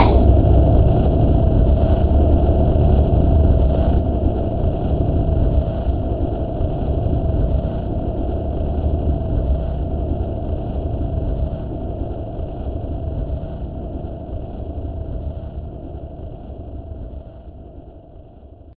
video game sounds games